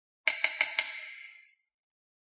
Tapping baton
Tapping a pencil against a piece of wood four times, and adding reverb, to give the effect of a conductor's baton.